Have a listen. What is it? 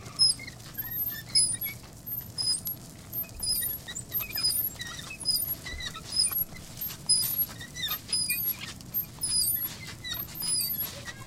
This is a pure recording of a young child riding a very squeaky trike along a path that has quite a bit of course sand on it.
child, field-recording, kid, path, playground, purist, ride, sand, squeak, squeaky, tricycle, trike